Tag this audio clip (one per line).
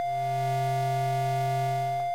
8-bit
8bit
arcade
chip
chippy
chiptone
game
lo-fi
retro
vgm
video-game
videogame